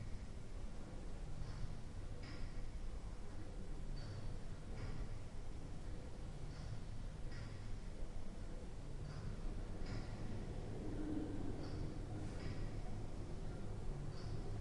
mbkl 2ndlevl
ambient recording of the 2nd exhibition level of the "museum der bildenden künste" (museum of art) in leipzig/germany. voices can be heard in the far distance, along with the rythmic ticking of the hydraulically powered art-installation "heart of a mountain".this file is part of the sample-pack "muzeum"recording was conducted with a zoom h2 with the internal mics set to 90° dispersion.